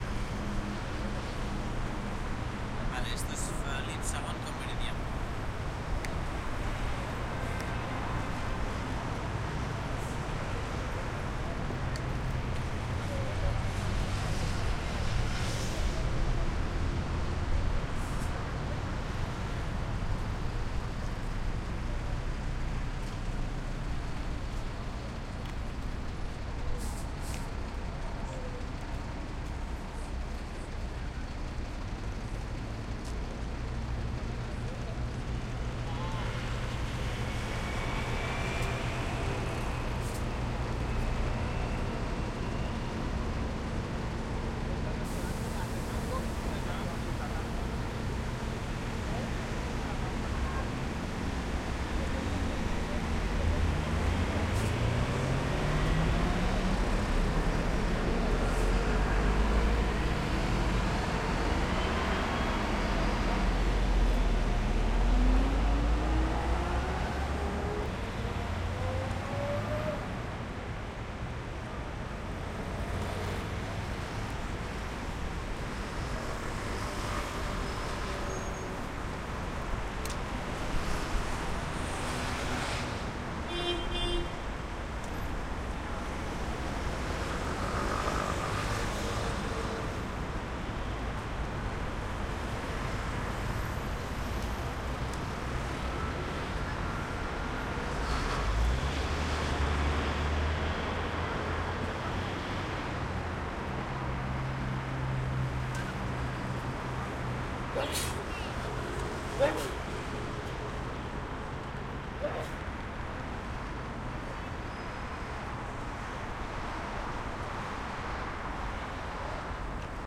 Calidoscopi19 Felip II 1
Urban Ambience Recorded at Felip II / Meridiana in April 2019 using a Zoom H-6 for Calidoscopi 2019.
Energetic, SoundMap, Traffic, Congres, Humans, Annoying, Complex, Construction, Calidoscopi19, Chaotic